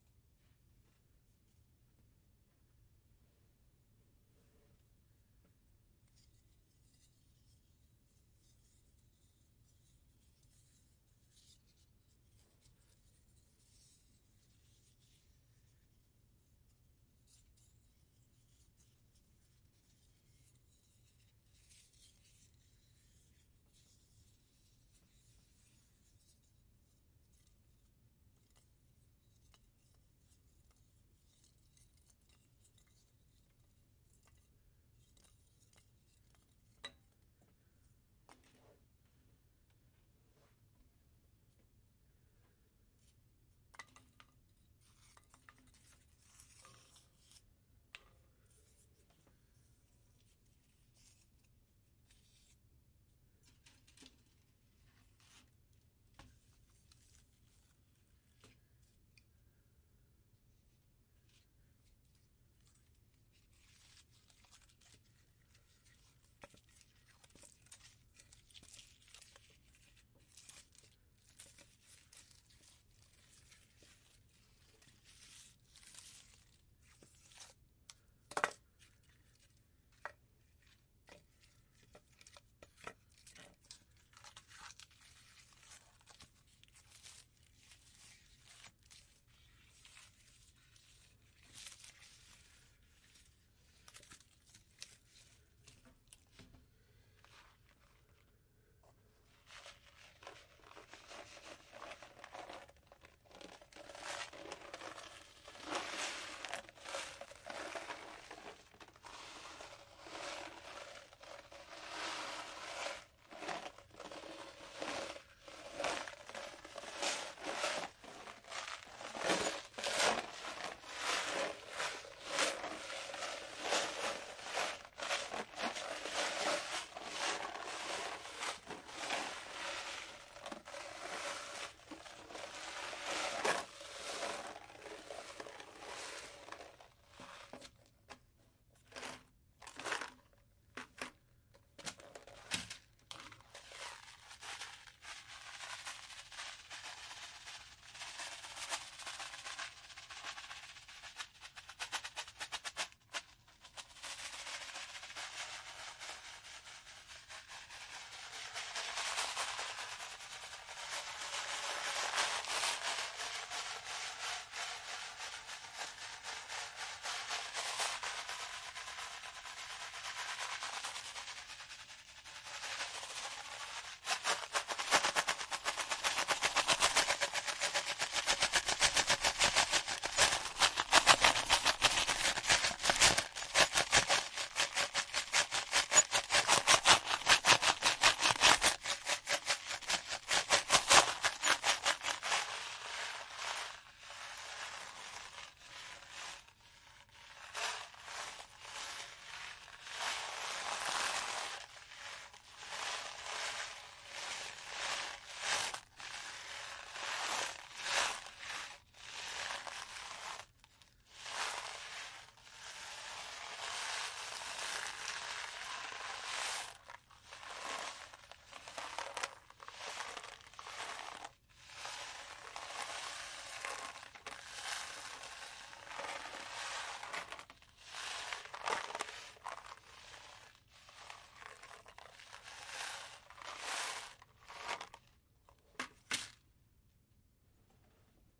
long form stereo recording perfect for granular sound design and atmospheric textures recorded with Audio-technica AT825